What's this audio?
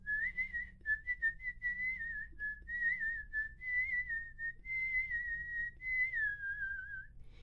This is a wistle trying to mimic a bird, this foley is for a college project.
call, canary, tweet